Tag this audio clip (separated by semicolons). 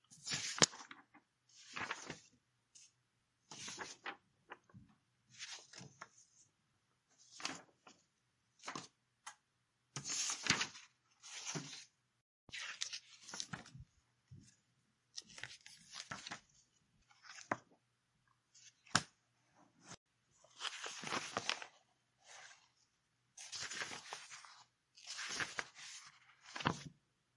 book; flip; interactions; page; pages; paper; read; reading; turn; turning; turning-pages